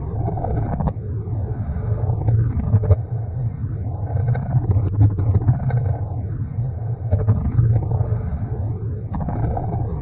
heavy rain of phantom water ambient sound effect